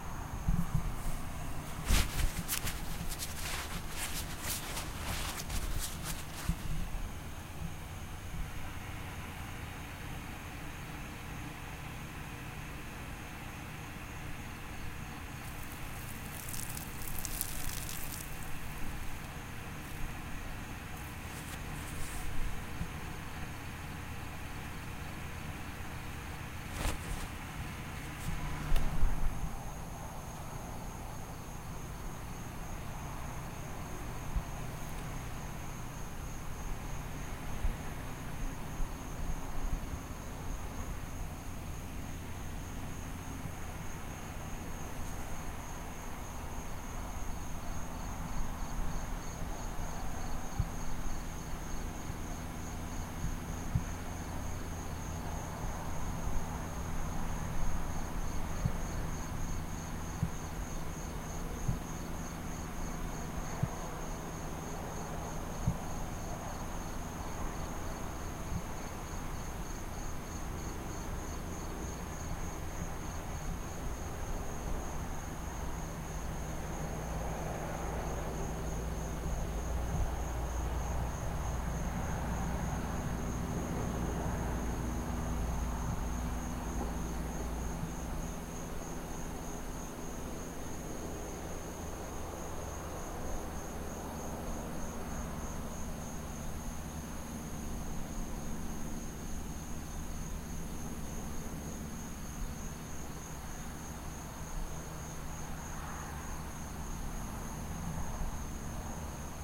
In another spot outside in Vero tending to the call of nature as a plane flies overhead.
field-recording, night, ambience, atmosphere, animals